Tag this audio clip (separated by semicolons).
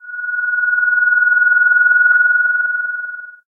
terror; thrill